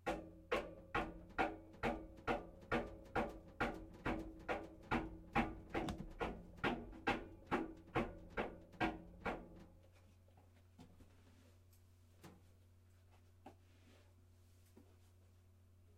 Rhythmic beating on the outside of a washing machine which is a great way to sound like a mechanical device is malfunctioning. Lots of samples in this set with different rhythms, intensities, and speeds.
Recorded on a Yeti Blue microphone against a Frigidaire Affinity front-loading washing machine.
machine, thump, beat